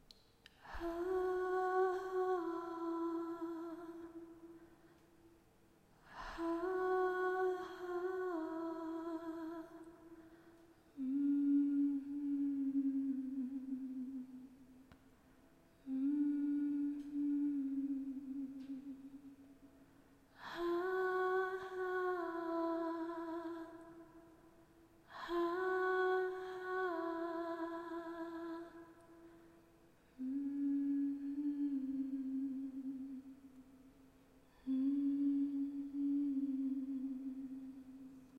My voice humming to a soft tone. I created this clip for my ASMR ambience video of Secondlife.
I would love to know how you use the clips for your production!